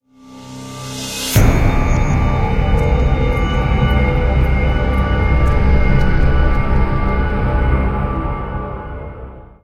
VM AE Stab 5 Full
action, ominous, stab, synth